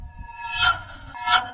Portal Phase Jump
Jumping/phasing through portals.
effects, videogame, videotape-sounds, portal, jump, phase